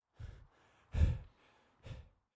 A recording of my heavy breathing that will play randomly as the player moves in my sound design project